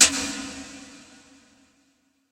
Roto tom with reverb effects processed with cool edit 96.